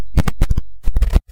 glitch and static type sounds from either moving the microphone roughly or some program ticking off my audacity
digital, electronic, glitch, noise, static